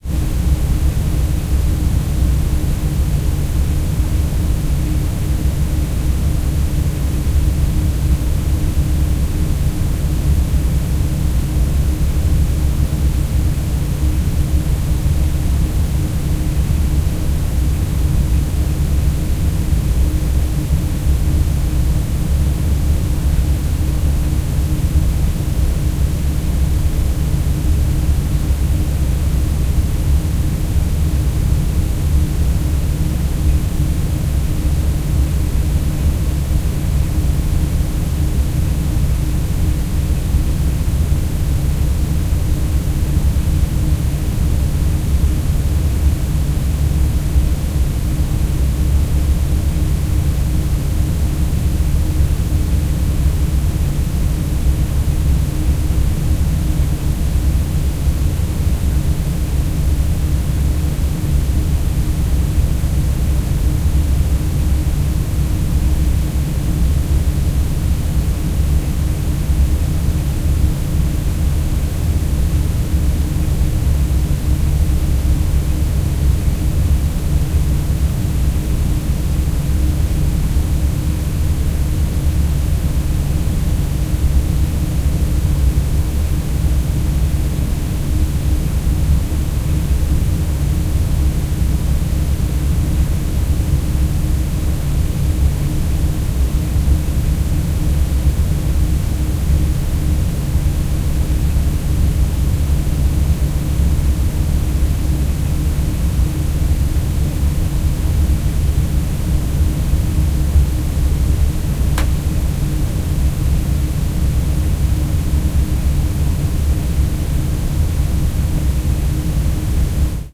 Roomtone Studio 1.09 Rear
Rear recording of surround room tone recording.
roomtone, sound, sounddesign, surround